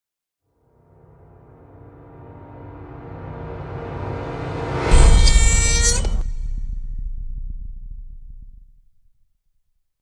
purgatory camera
A texas chainsaw style camera effect
cam, chainsaw, effect, Texas